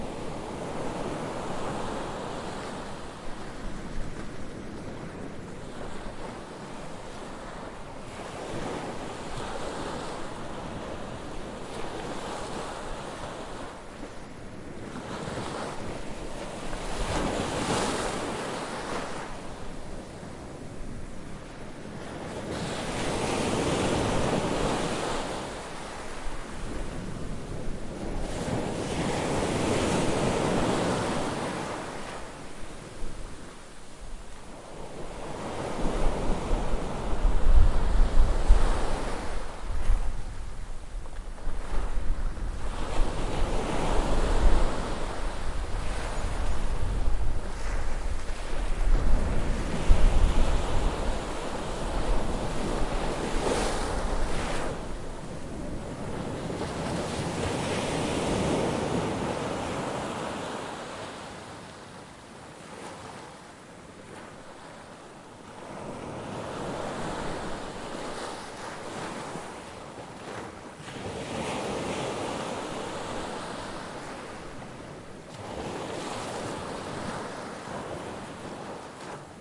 Recorded at a beach in Santa Marta, Colombia
beach, coast, ocean, waves, shore, seaside, water, sea